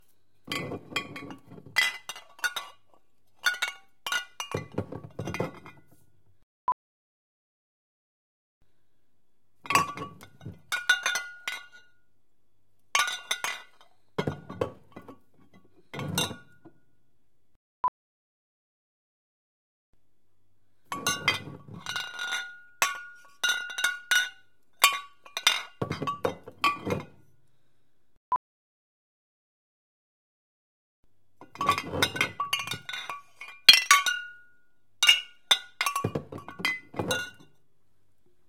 Glass Spirit bottles clanking
stereo, running, catering, field-recording, water